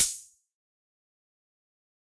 these are drum sounds and some fx and percussions made with zynaddsubfx / zyn-fusion open source synth with some processing mostly eq and compression
drum, drums, drum-synthesis, hit, kicks, one-shot, percs, percussion, percussive, sample, single, snares, synthesis, synthetic, zyn, zynaddsubfx, zyn-fusion